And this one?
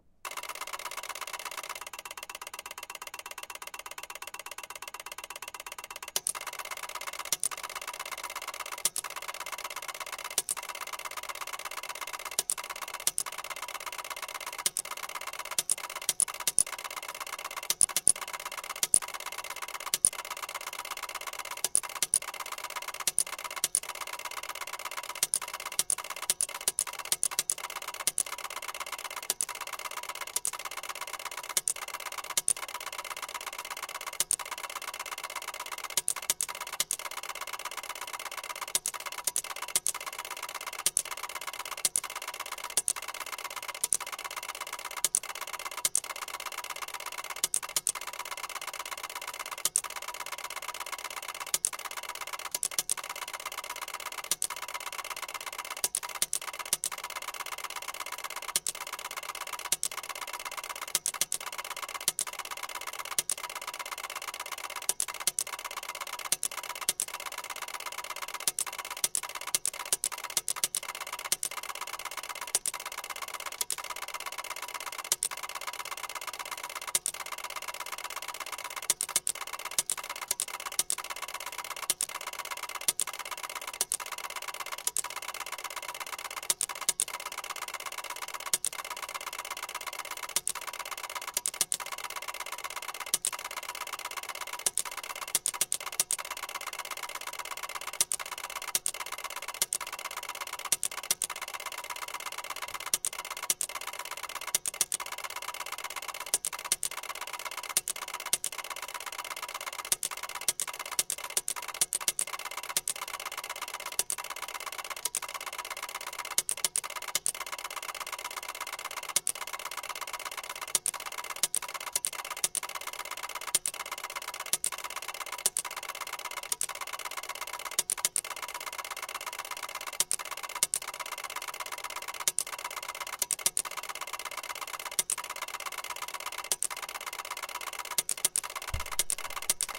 Ticker Tape Machine FF651
soft sporadic ticks interspersed in constant ticking
tape, ticker, ticks, constant